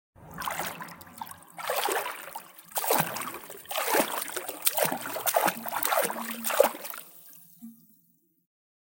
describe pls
Carlos R - Swimming in the Pool
Person swimming in a pool.
Swimming, pool